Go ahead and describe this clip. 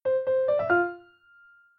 Dat's Right!
Short piano tune for a right answer! Same sound as Dat's Wrong sound, to be used together.
answer, confirmed, correct, game, good, perfect, piano, quiz, right, super, test, trivia